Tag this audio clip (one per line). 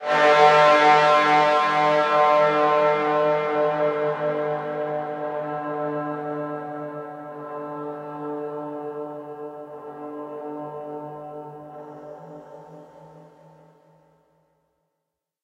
stretching
transformation
trombone